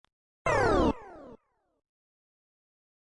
I used FL Studio 11 to create this effect, I filter the sound with Gross Beat plugins.
computer, digital, electric, fx, game, lo-fi, robotic, sound-design, sound-effect